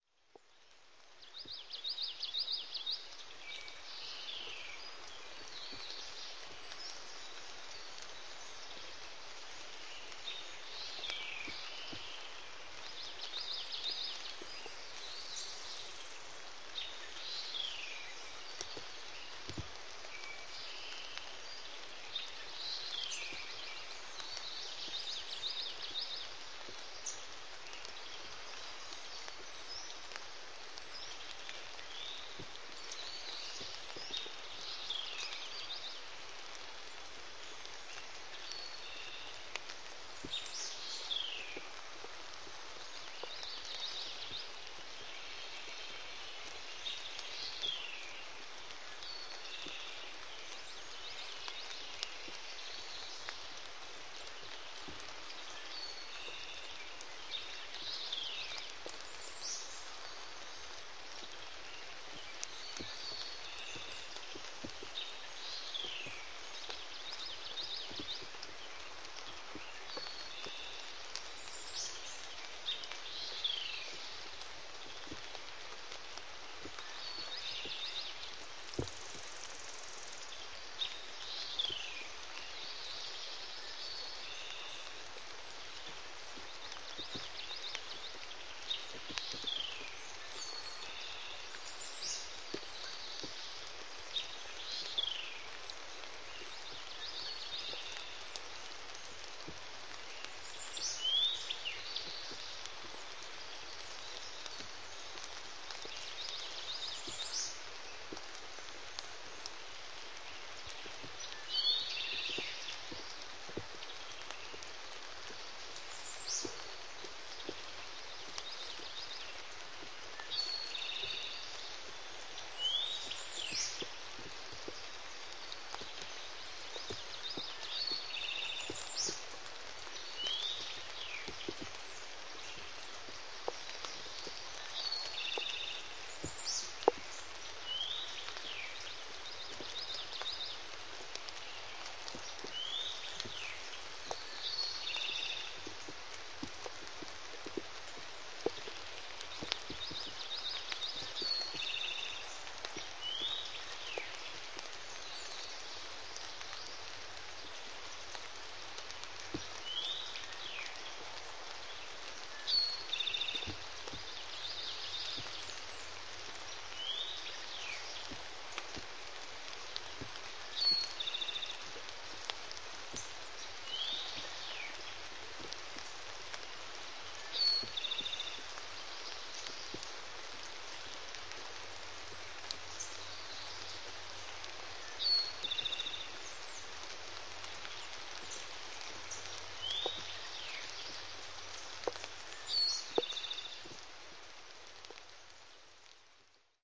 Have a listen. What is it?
A nice ambient recording made on Sunday morning May 5th 2013 around 6:30 in the morning in the Shawnee National Forest in deep southern Illinois. I had originally wanted to get good, clear recordings of the tremendous numbers of warblers that are here, but a good, soaking steady light rain decided to stick around. I though, what the heck, that is a part of our natural world also, so let's get it all "on tape"....hope you enjoy this.
Recording made with my Handy Zoom H4N recording utilizing its built-in microphones. To keep as much water off of my H4N as possible, I put one of my big, furry, knitted insulated sock-cap over the recorder, that at least kept my recorder from swimming in water.
birds, warbler